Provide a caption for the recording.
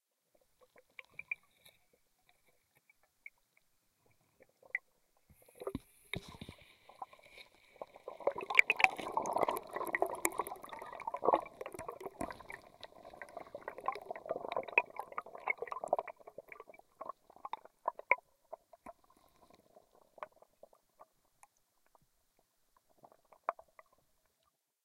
A hydrophone recording of a stick being poked into the mud at the bottom of a pond to release some of the methane trapped within.Piezo hydrophone > Shure FP 24 > Sony PCM M10